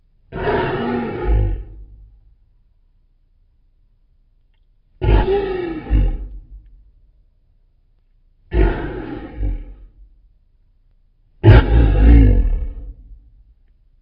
A lion ROAR make with a simply filter